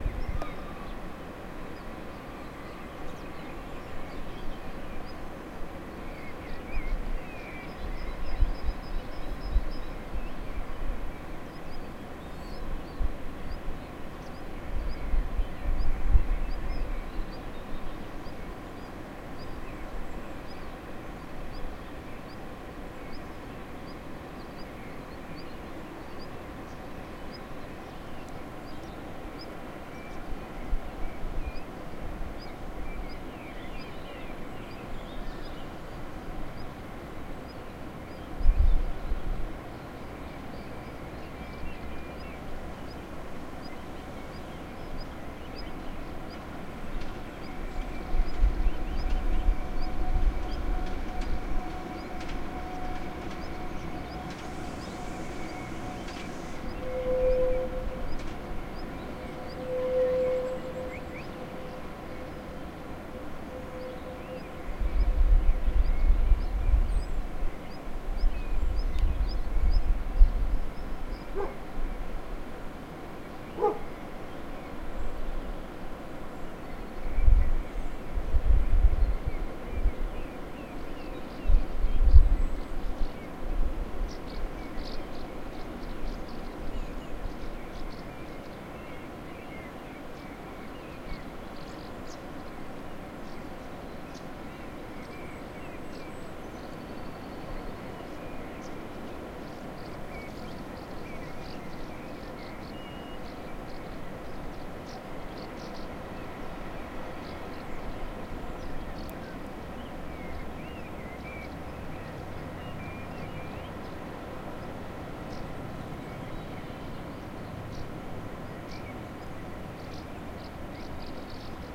04 ambient castell
ambient, field-recording, ambiance, wood, mountain